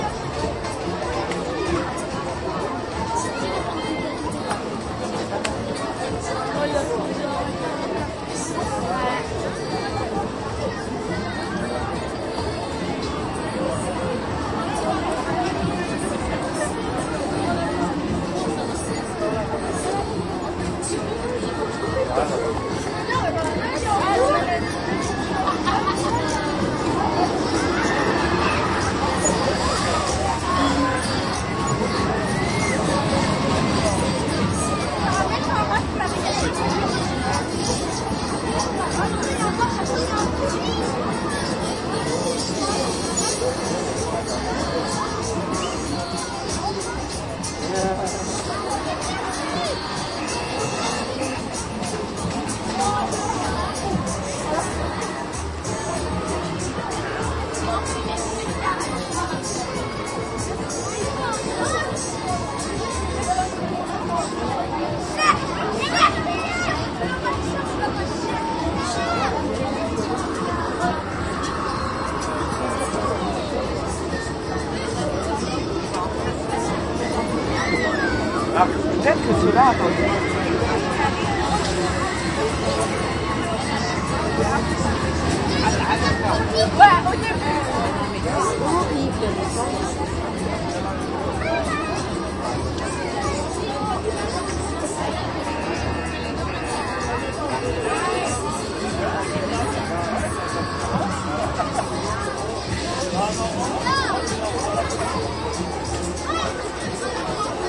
funfair France people passing by
A large fun fair in Lyon France. People and children passing by. A small roller coaster in the background. Stereo. Recorded with a Marantz PMD 660, internal mic.
noise, children, attraction, france, funfair, crowd